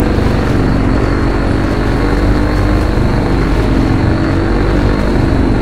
A loop of a speedboat created with Audacity.